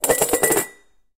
metal bowl - spinning - upside down 05
Spinning a metal bowl on a laminate counter top, with the bowl upside down.
spun, bowl, dish, spinning, countertop, laminate, metal, spin, plastic, counter-top, metallic